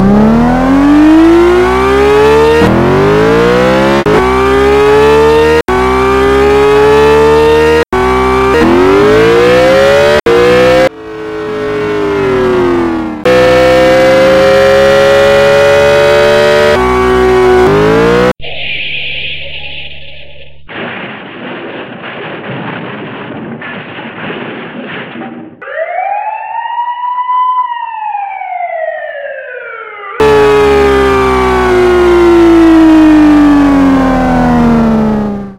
Crash Scene During Le Mans
Made with Audacity. 3 cars in one race, one of them spins out and crashes into a wall. 911 was called, the 2 other cars pulled over to help the crashed driver.
acceleration, audacity, car, crash, custom, le, mans, movie, race, racing